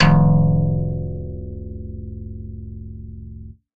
1051 HARD BASS-F1-TMc-
An original electric bass emulation synthesized in Reason’s Europa soft synth by Tom McLaughlin. Acts as loud samples with MOGY BASS as medium, and MDRN BASS as soft samples in a velocity switch sampler patch.
bass, emulation, chromatic, multisample, electric